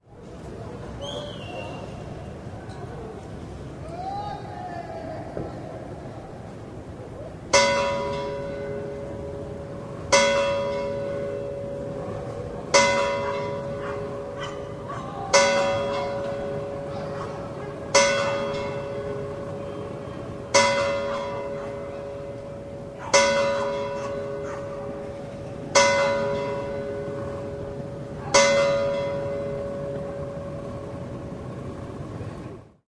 bells SMP distant
Bells of the small church of Sant Marti de Provençals (Barcelona). Recorded from a near and reverberant park, with MD Sony MZ-R30 & ECM-929LT microphone.
barcelona, small-church